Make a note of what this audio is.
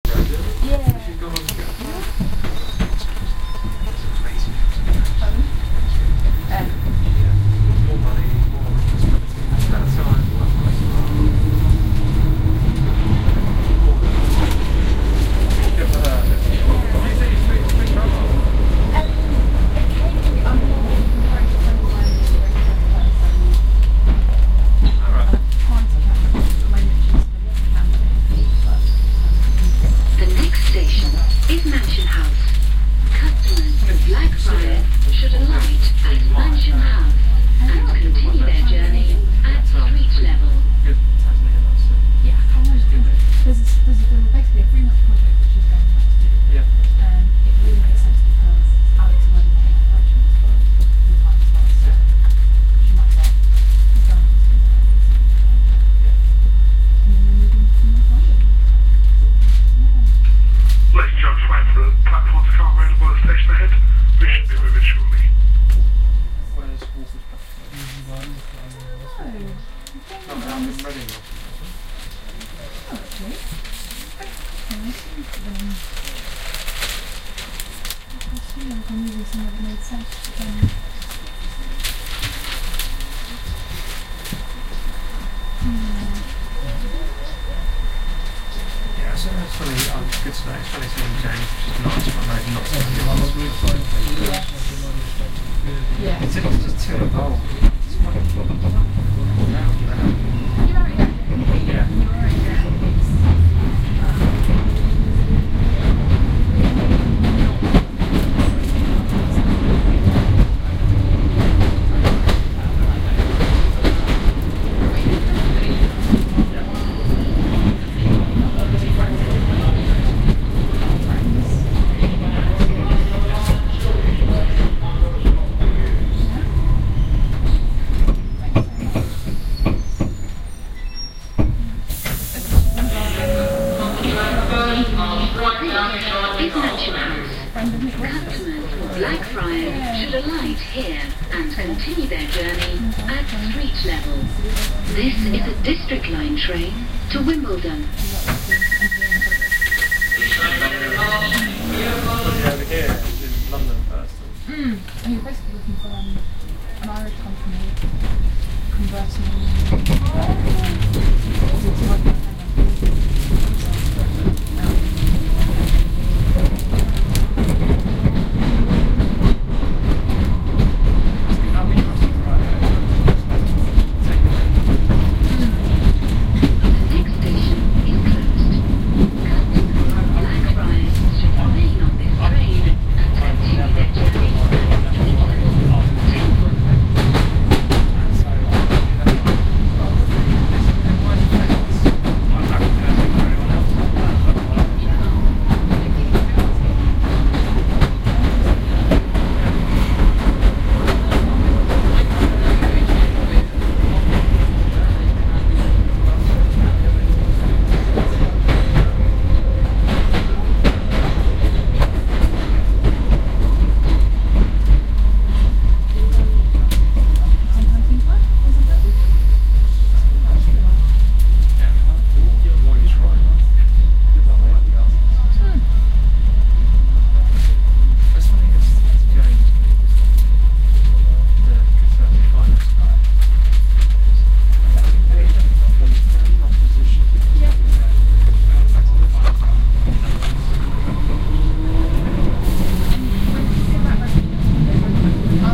Blackfriars - Announcement the station is closed